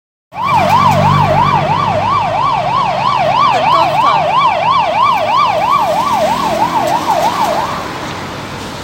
Ambulance siren
Ambulance, danger, emergency, siren